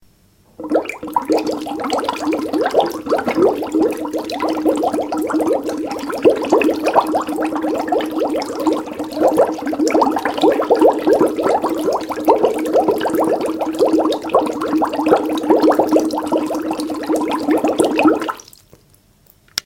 Bubbles, Heavy, A
Raw audio of bubbles produced by blowing into a straw in a water-filled sink. In this recording, the sink is near its fullest to produce heavier sounding bubbles.
An example of how you might credit is by putting this in the description/credits: